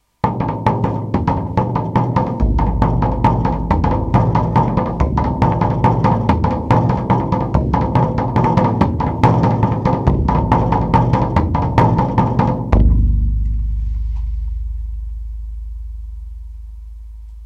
beat variations, three drums, own designs
drum, experimental, prototypes